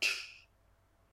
Made for the dare 19, human beatbox dare. this sound is some sort of percussion, made with my mouth.